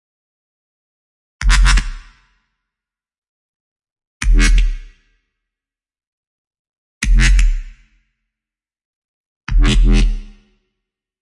1x bass loop1
Dirty Bassloop created with Massive.
Electro, Dubstep, Bassline, Bassloop, Bass